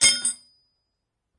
Metal Drop 3
A bunch of different metal sounds. Hits etc.